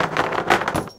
Table Soccer3
Recording during table soccer playing using 10 balls at once. I cutted out this part to use it as a basic groove.